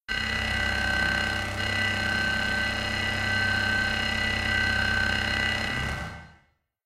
Striker Far
sounds about across the room less bass adn high end more verb
digital, fx, harsh